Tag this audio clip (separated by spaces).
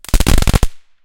field-recording crackle stereo pop firework firecracker